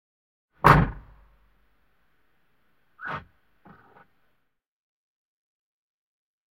Door Bang and Lock
closes, close, doors, door, slam, open, opening, closing, bang, shut